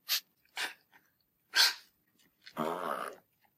Miscellaneous Chihuahua sounds
Here's recordings of my chihuahuas. There's a sneeze, a choking sound, and a burp.